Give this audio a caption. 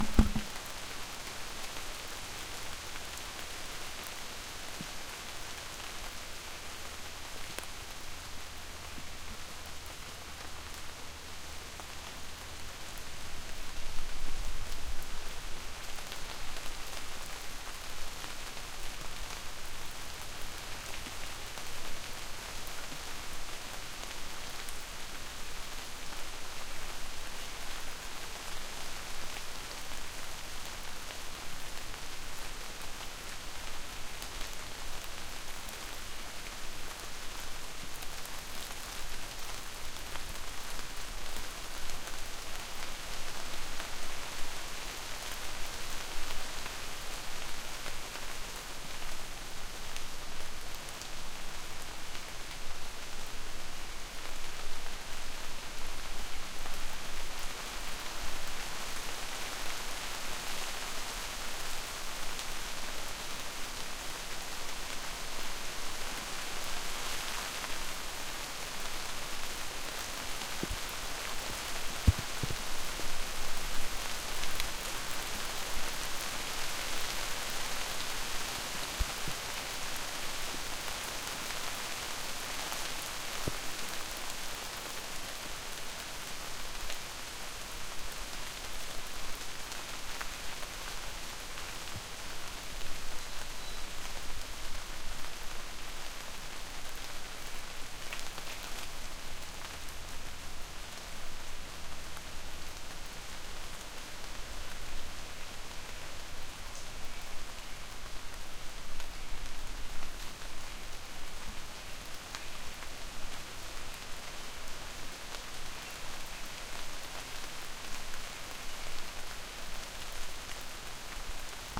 Light Rain in Forest
forest, light, rain